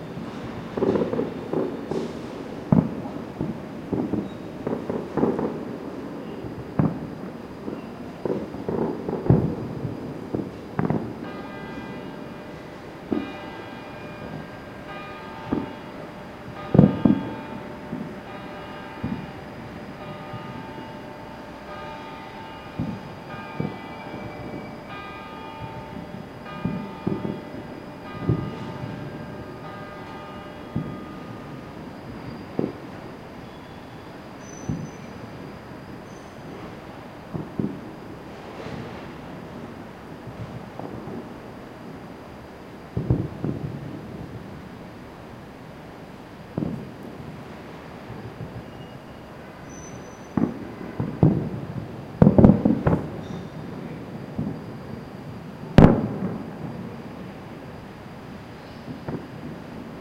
20061002.distant.battle
fireworks recorded from a large distance and church bells striking 12. Sennheiser ME66 + AKG CK94 decoded to Mid-side stereo / fuegos artificiales grabados desde muy lejos y campanas dando las 12
city, bells, fireworks, field-recording